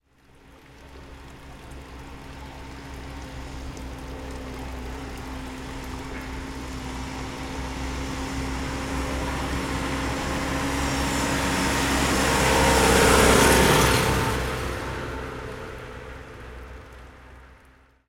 Sound of a tractor passing. Sound recorded with a ZOOM H4N Pro and a Rycote Mini Wind Screen.
Son de passage d’un tracteur. Son enregistré avec un ZOOM H4N Pro et une bonnette Rycote Mini Wind Screen.
machinery, agriculture, switzerland